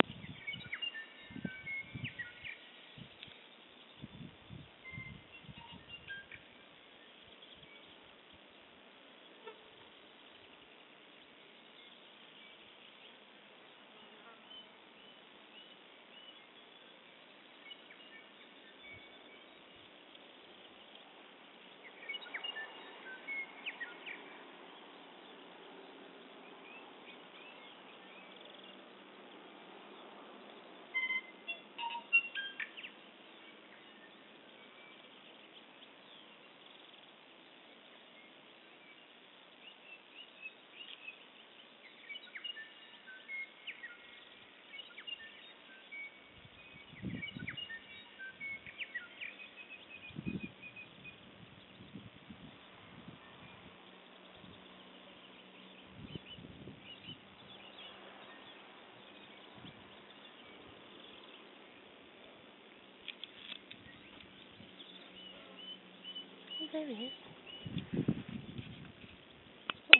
birdsong in new zealand dated 05/12/2005